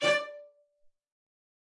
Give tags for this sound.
strings cello-section midi-velocity-95 spiccato multisample d5 cello vsco-2 midi-note-74 single-note

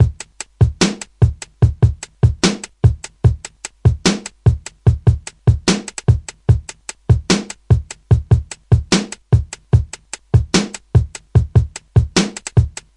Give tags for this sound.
beat drumbeat

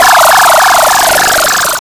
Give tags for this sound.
8bit beamup videogame

